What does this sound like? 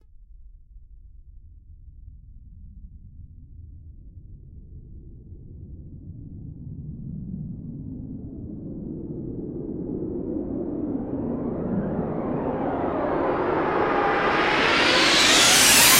syn whoosh abrupt end 01
A buildup whoosh!
sfx, whoosh, buildup, effect, fx